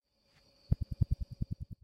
Flicking my fingers manipulated by reverse and pitch/tempo change.